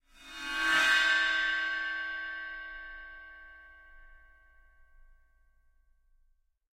cymbal cymbals drums one-shot bowed percussion metal drum sample sabian splash ride china crash meinl paiste bell zildjian special hit sound groove beat
beat, bell, bowed, china, crash, cymbal, cymbals, drum, drums, groove, hit, meinl, metal, one-shot, paiste, percussion, ride, sabian, sample, sound, special, splash, zildjian